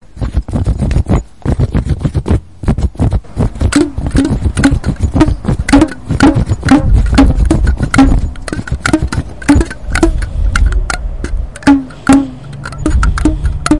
first soundscape made by pupils from Saint-Guinoux